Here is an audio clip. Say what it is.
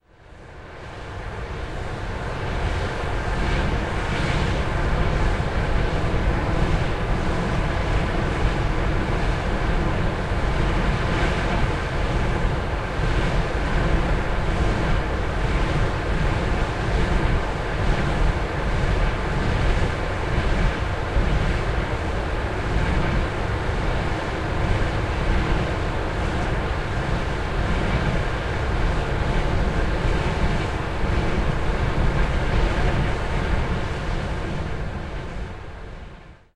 Omnia, flare noise, close perspective
put your hazmat suits on, for this recording, I use a boom pole to get my zoom h4n pro dangerously close to the base of the flare tower at the Sasol complexes of Natref refinery and Omnia fertilizer processing unit. You can here the massive flare just in front of me, the flare burns any toxic gasses witch are released during the production of fertilizer. Recorded in XY stereo 120 degrees. Zoom H4N Pro, internal microphones.